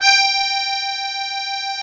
real accordeon sound sample